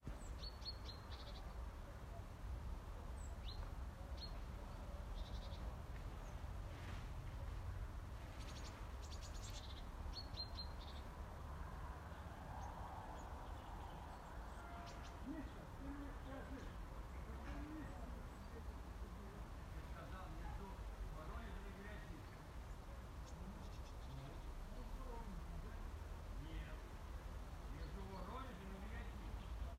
Foley, Village, Roomtone, Russia
Empty, Quiet, Room, Roomtone, Russia, Tone, Village